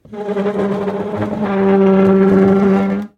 Chair-Stool-Wooden-Dragged-03
The sound of a wooden stool being dragged on a kitchen floor. It may make a good base or sweetener for a monster roar as it has almost a Chewbacca-like sound.
Ceramic, Drag, Dragged, Kitchen, Monster, Pull, Pulled, Push, Pushed, Roar, Snarl, Stool, Tile, Wood, Wooden